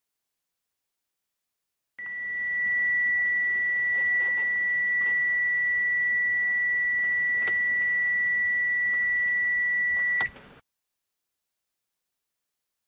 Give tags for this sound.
car; pitido; spanish

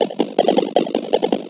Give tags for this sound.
chugging; computer; digital; drum; effect; electronic; loop; machine; modulated; perc; percs; percussion; rhythm; sfx; sound; space; whirring

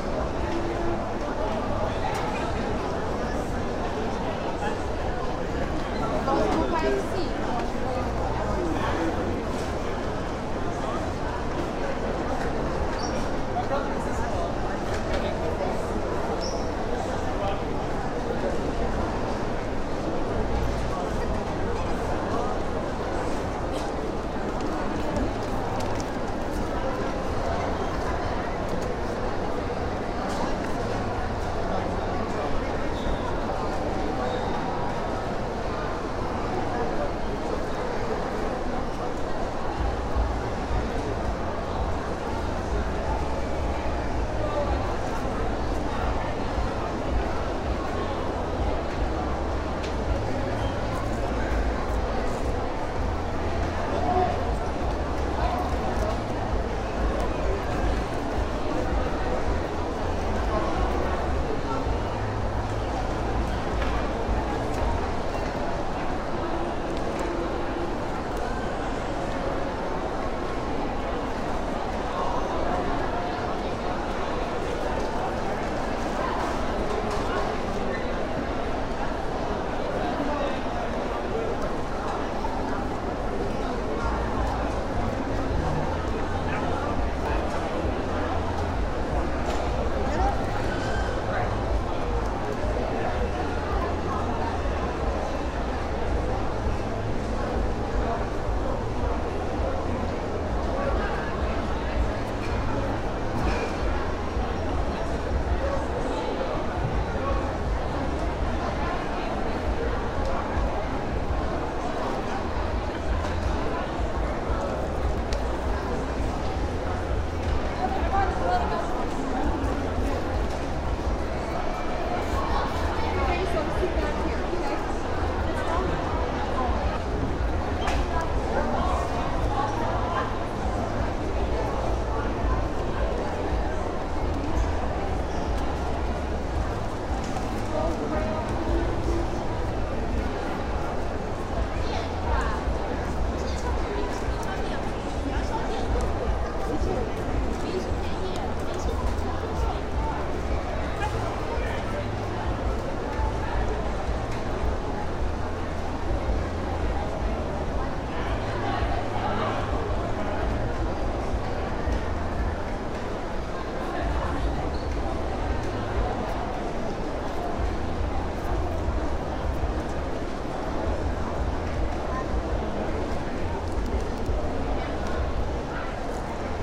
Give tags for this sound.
shopping; shoppingmall; mall; crowd; field-recording

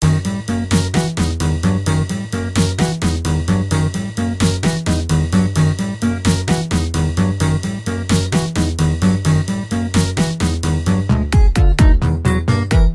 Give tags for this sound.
prism; sbt; music-for-videos; free-music-to-use; download-free-music; electronic-music; download-background-music; free-music-download; syntheticbiocybertechnology; vlogger-music; music; vlog-music; music-for-vlog; free-music; loops; background-music; music-loops; free-vlogging-music; audio-library; vlogging-music; download-music; vlog

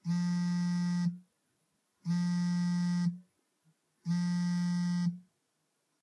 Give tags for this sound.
cell; hand; iPhone; mobile; phone; vibrate; vibrating

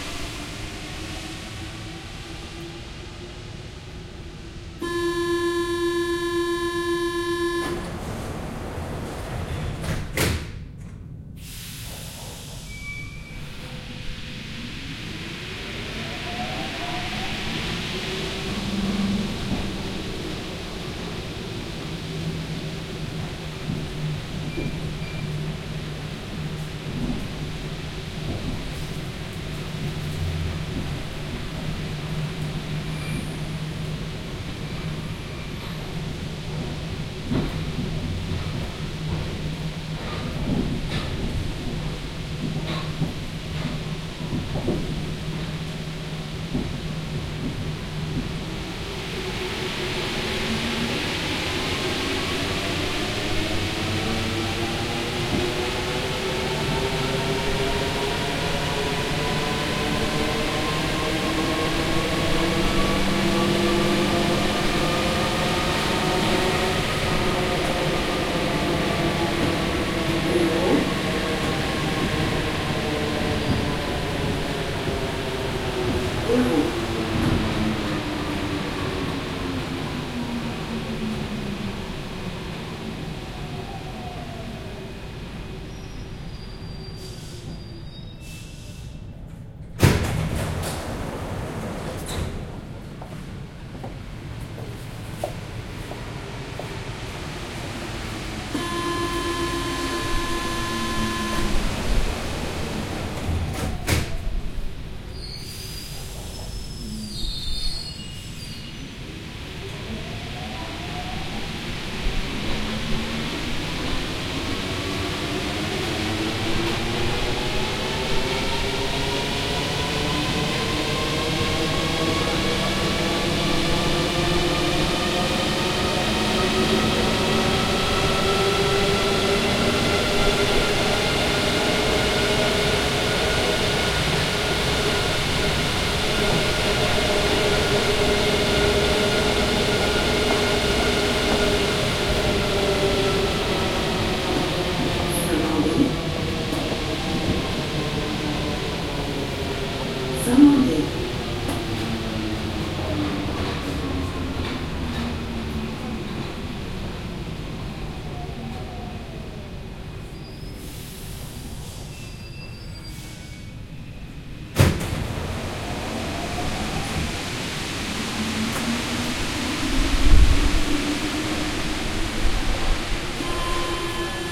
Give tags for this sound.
paris; subway; city; noise

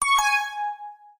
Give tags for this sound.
sweet; alert; warning; cute; sound; caution; alarm

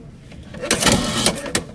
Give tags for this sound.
addressograph; hospital-card; stamp

